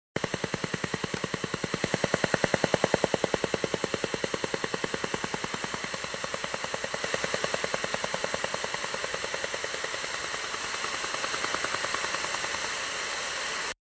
sw sounds 1
Tapping pulse sound- possibly a radar- found on a shortwave radio band. Recorded from an old Sony FM/MW/LW/SW radio reciever into a 4th-gen iPod touch around Feb 2015.
interference, noise, pulse, radar, radio, russian-woodpecker, shortwave, static, tap, tapping